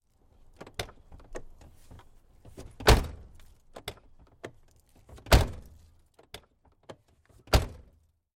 Car door open and close